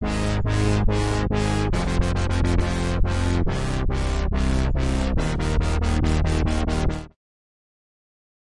nice wobble
wobble, wah, bass